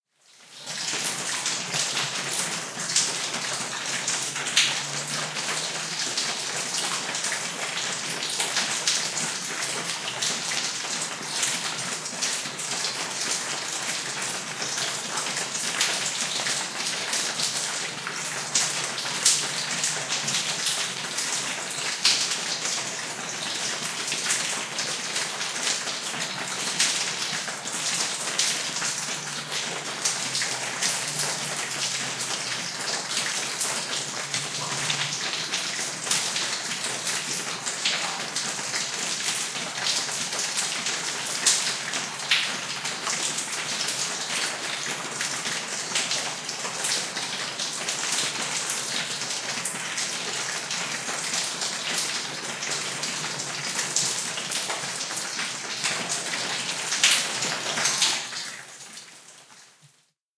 Water falling in mine
Stereo field recording of water falling from the roof of a chamber in an abandoned lead mine.Recording chain Rode NT4>FEL battery pre amp>Zoom H2 line in.
bedrock, cave, cavern, chamber, drip, drops, falling, field-recording, geo, geological, lead, mine, mineral, petrological, plop, rock, splash, stereo, stone, stones, water, wet, xy